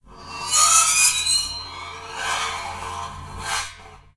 waterharp-like scraping sound
waterharp, scrap, sfx, rust, spooky, water-harp, rusty, sound-effect, metal